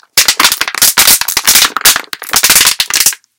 GLISIC Marijana 2020 2021 Crisp
For this sound, I recorded the sound of a bottle being bent, then I made it sharper.
bottle, crispy, destruction, noise